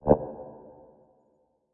This is a short, amplitude-modulated, reverb, short attack, short decay, sine wave.